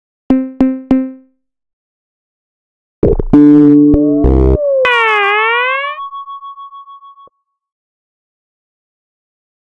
20140316 attackloop 120BPM 4 4 Analog 1 Kit ConstructionKit WeirdEffectsStepFiltered2
This loop is an element form the mixdown sample proposals 20140316_attackloop_120BPM_4/4_Analog_1_Kit_ConstructionKit_mixdown1 and 20140316_attackloop_120BPM_4/4_Analog_1_Kit_ConstructionKit_mixdown2. It is a weird electronid effects loog which was created with the Waldorf Attack VST Drum Synth. The kit used was Analog 1 Kit and the loop was created using Cubase 7.5. Various processing tools were used to create some variations as walle as mastering using iZotope Ozone 5.
120BPM, electro, dance, electronic, ConstructionKit, weird, loop, rhythmic, sci-fi